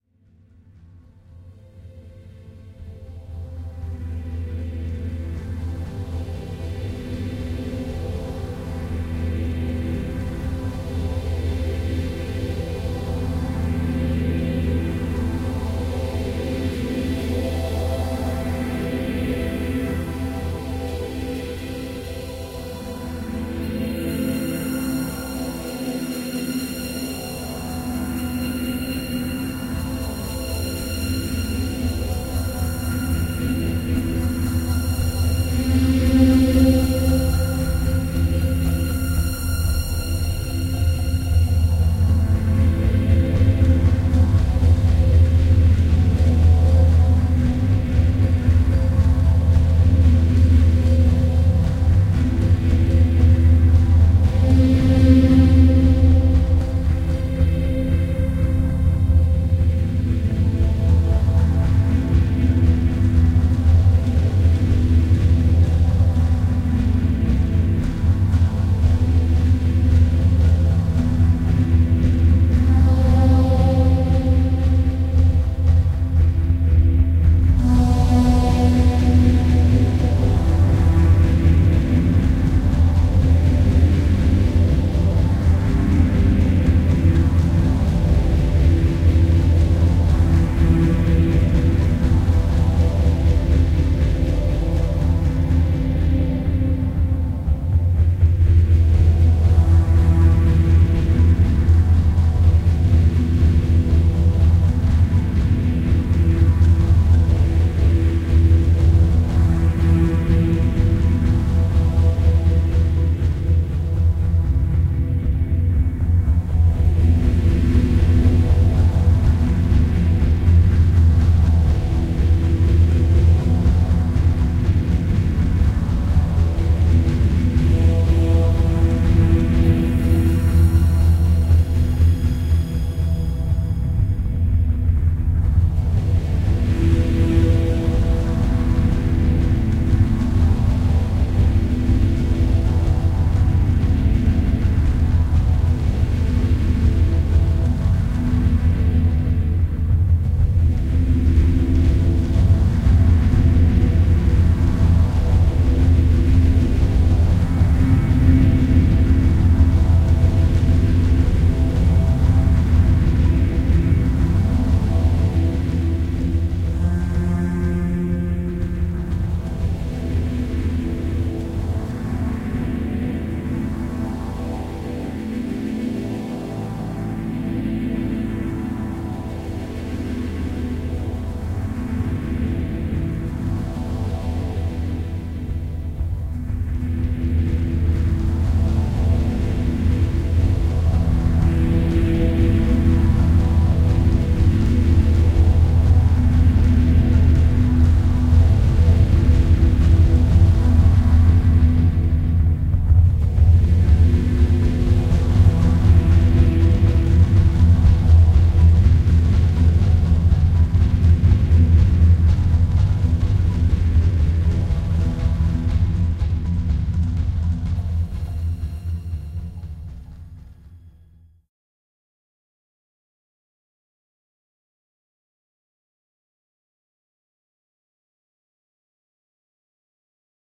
Strings with Percussion
Rhythm with the light sound of strings and no melody. Might be good background music as it's not too demanding of attention.
music, strings, dramatic, background, orchestral, film, ambient, instrumental, pad, percussion, ambience, rhythm